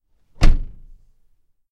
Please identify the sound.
Shutting car door
Closing a Car door
door, closing, car, vehicle